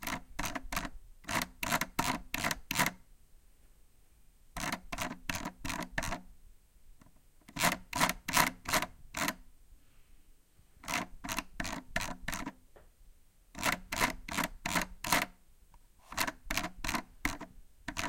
Scrooling by mouse